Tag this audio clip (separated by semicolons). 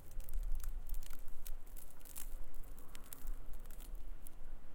crackle; natural